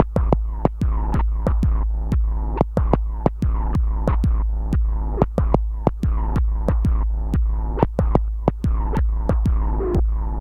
Weird FX Loop :: Dog Beat
Enjoy the introverted lo fidelity feel downbeat dog beat loop